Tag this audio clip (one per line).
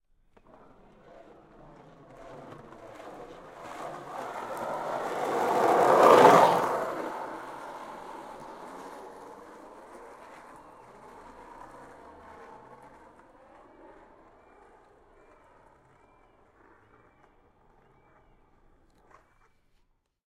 asphalt
long-board
pass
road
skate